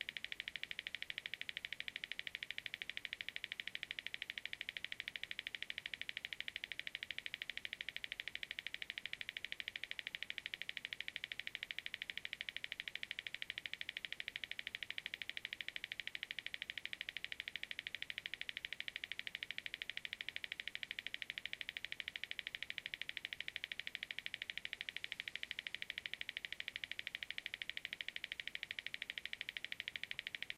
This is the sound of a broken musical greeting card that once played the song "The Best" written by Chapman and Knight, and sung by Tina Turner.
Upon opening, it now sounds like static.